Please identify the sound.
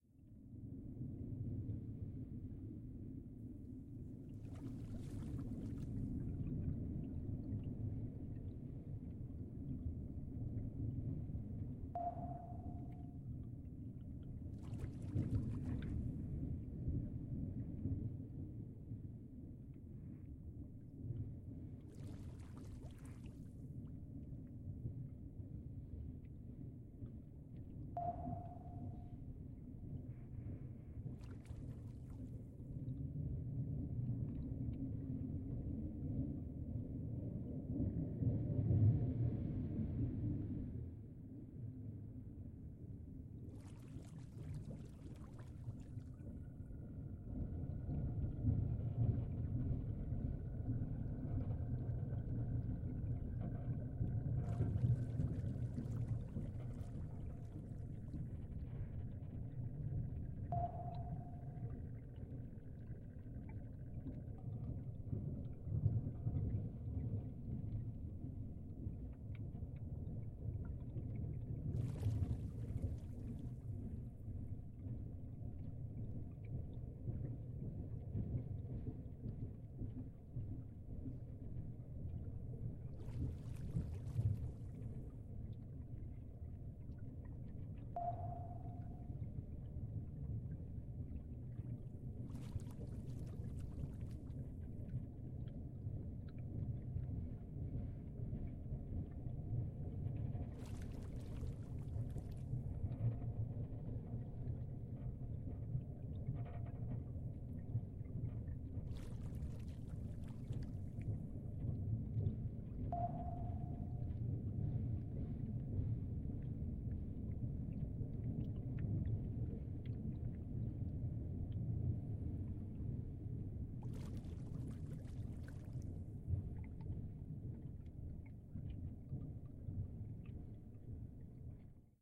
Calm, soothing, underwater ambiance. Includes bubbles and general underwater noises.